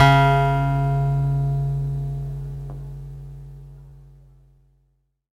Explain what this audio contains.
Sampling of my electro acoustic guitar Sherwood SH887 three octaves and five velocity levels
acoustic, guitar, multisample